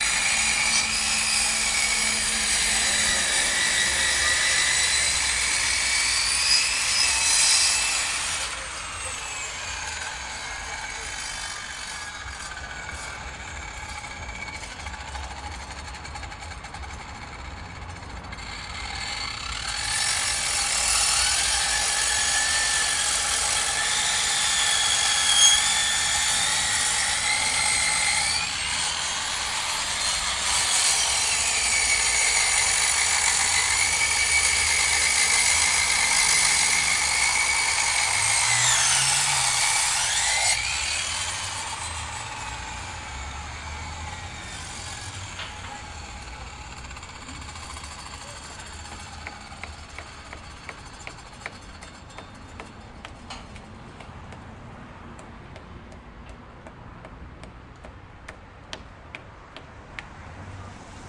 Sound of hand circular saw. Workers do construction work. They try make door where previously was a window.
Recorded 2012-09-29 05:15 pm.